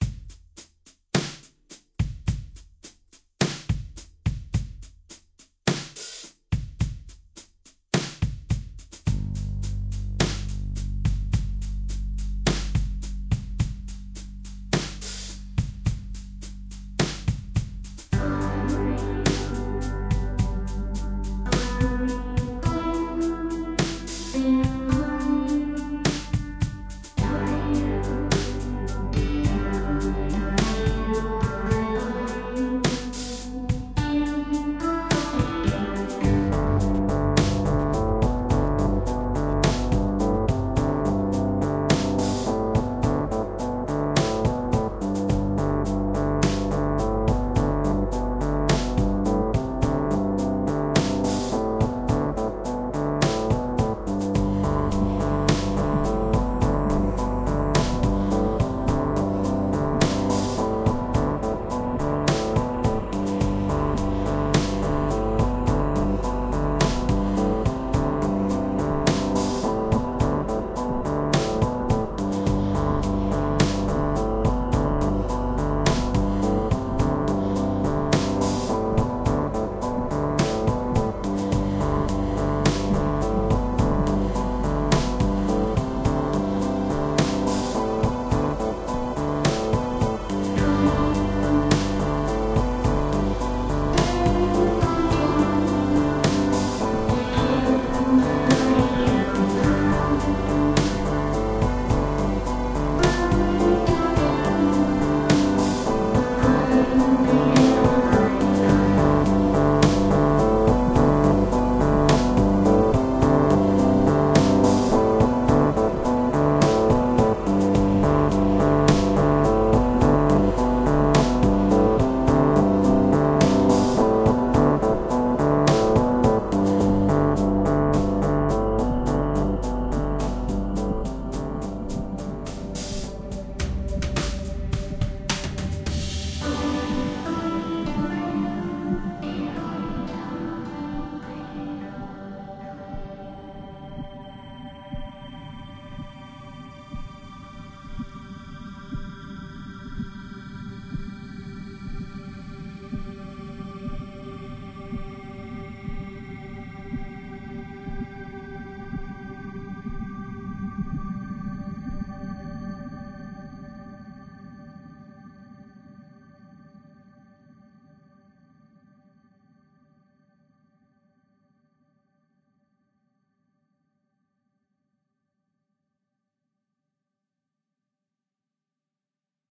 Smooth Science Groove-106-G
Nice driving, smooth groove in the key of G. 106 BPM. Ambient, atmospheric, cosmic. Has a science vibe to it, good for lead-ins and for plateaus.
ambient, atmosphere, atmospheric, cosmic, driving, g, groove, key, science, synths, technology